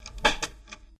Radio band switch 04 mic
recorded sound of the band changing switch, the same old radio. ITT.
AM, effect, switch